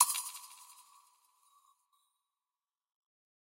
Metal Drops 4
Tweaked percussion and cymbal sounds combined with synths and effects.
Abstract, Dripping, Percussion, Sound-Effect